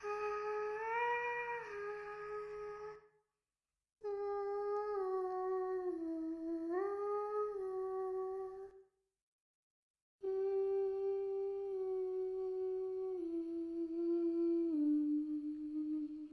ghostly humming
ghast, ghost, ghostly, halloween, horror, hum, humming, scary, sing, singing, spooky